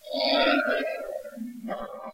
monster pain7
creepy, scary